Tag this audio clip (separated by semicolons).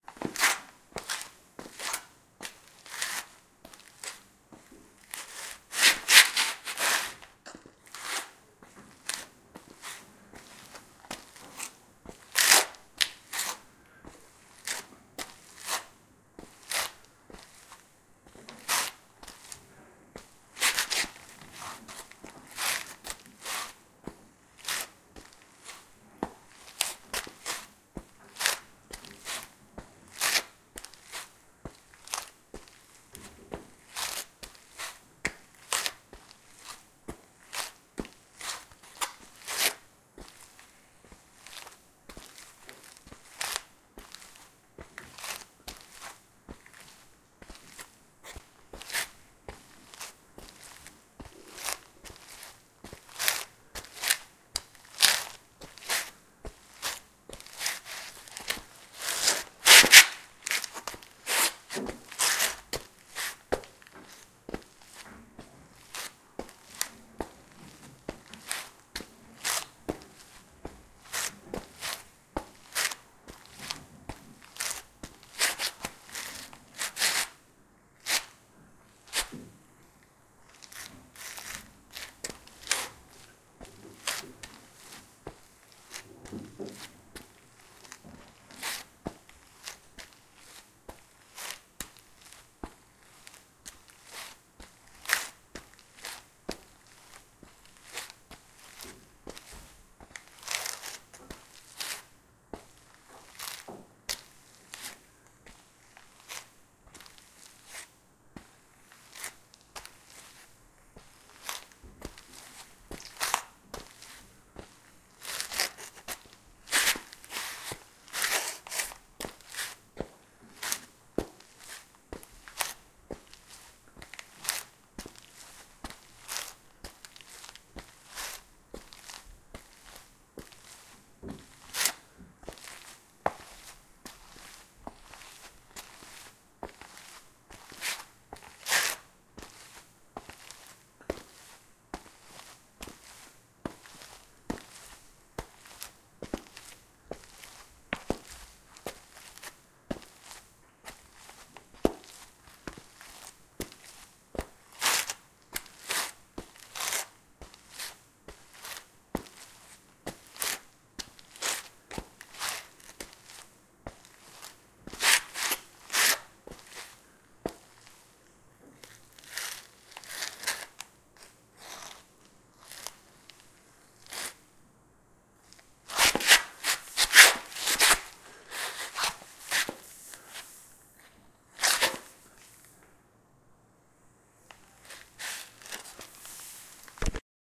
ambient; basement; cellar; dusty; floor; footsteps; soundscape; steps; walking